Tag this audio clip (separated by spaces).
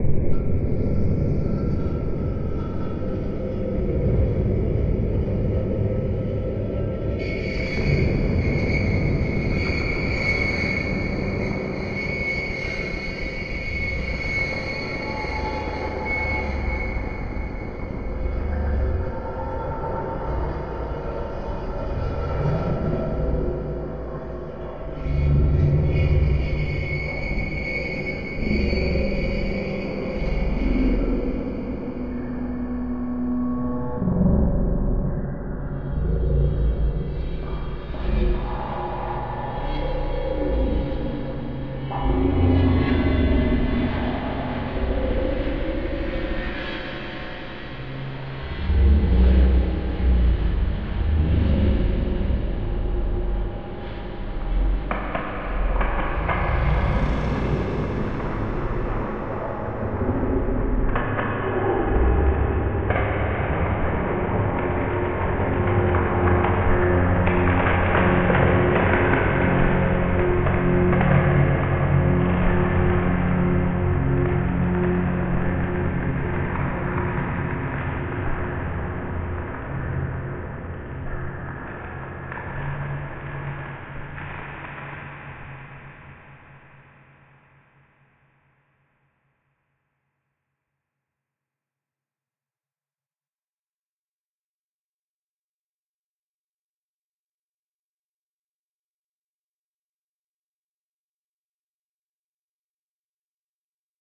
ambient artificial divine dreamy drone evolving experimental horror multisample organ pad scary smooth soundscape space